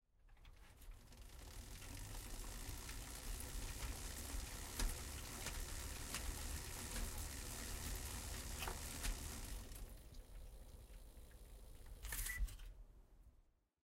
wheel-chain-brake
Driving a chain of a bike
bicycle, Bike, brake, chain, handbrake, pedal, rhythm, rubber, sheel, speed, turning